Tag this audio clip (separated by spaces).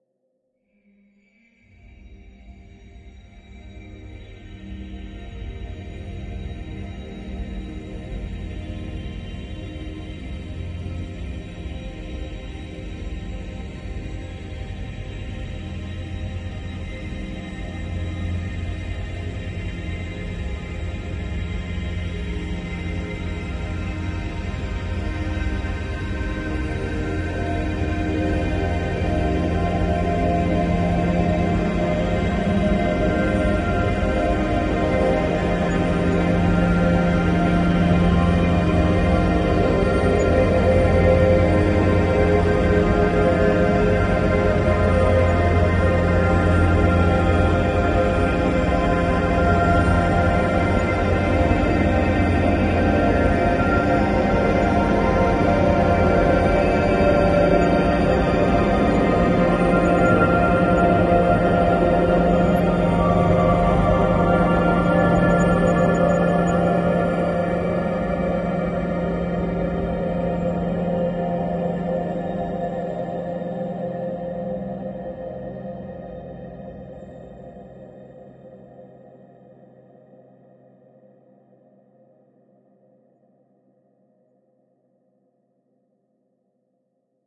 soundscape dreamy ambient evolving artificial drone pad multisample